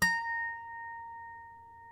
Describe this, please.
lap harp pluck